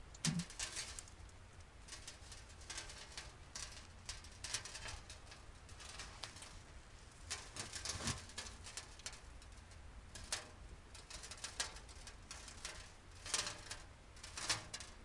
sand pour on metal FF665
sand, sand pour on metal, metal
metal, pour, sand